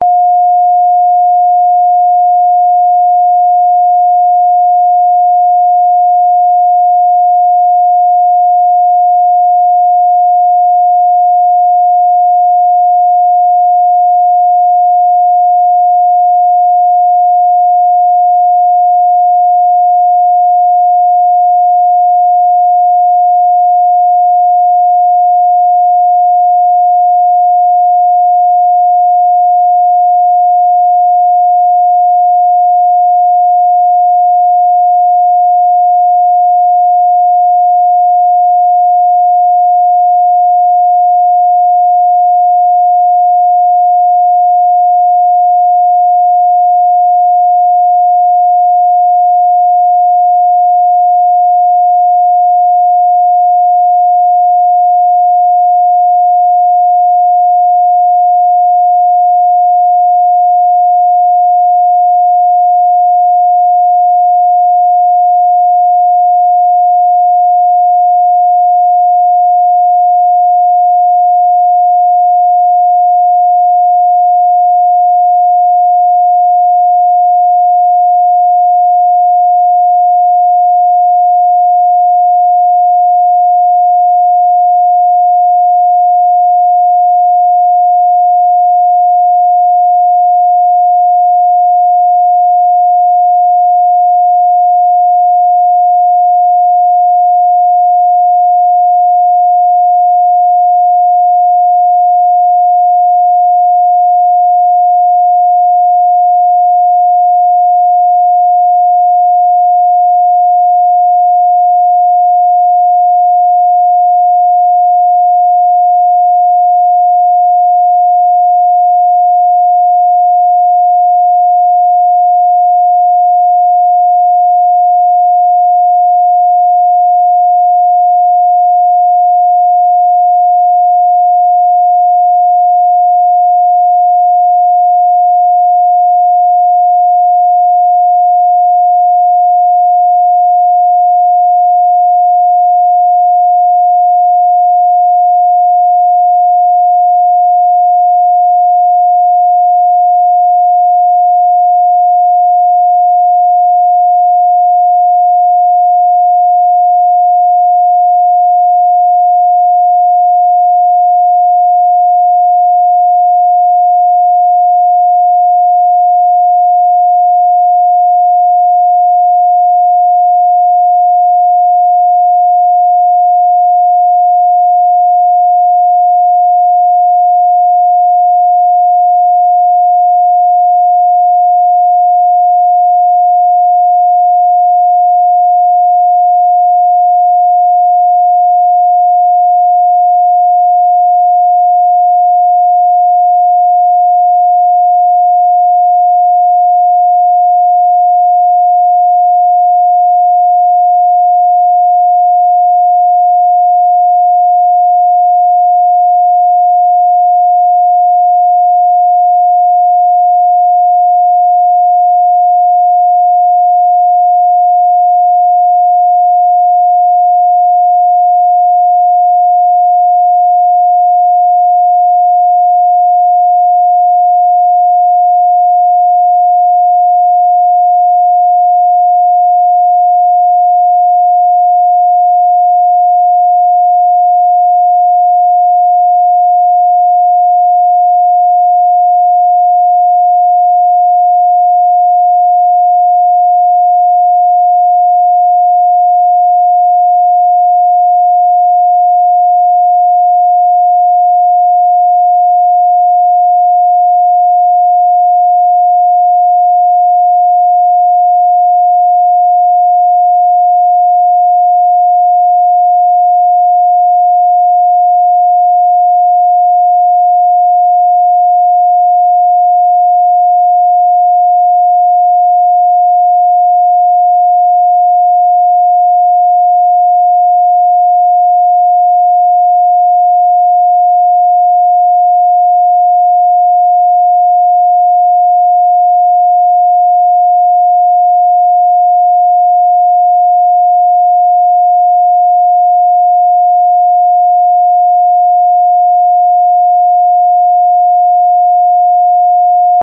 714Hz Solfeggio Frequency - Pure Sine Wave
May be someone will find it useful as part of their creative work :)